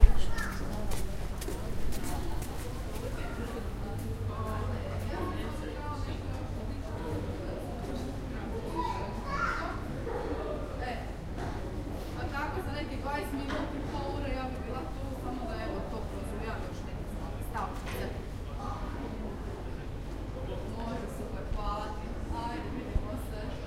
winter night street ambience
Night recording in the narrow street.
street, ambience, people, field-recording